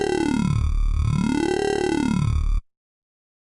Filthy Yuy LFO
A filthy yo bass sample I created, enjoy.
Dubstep, Bass, Electro, LFO